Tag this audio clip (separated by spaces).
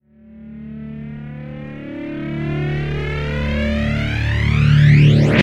axe distortion fuzz guitar phase phasing reverse sweep